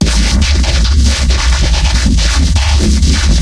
Another growl bass for Dubstep written in F#.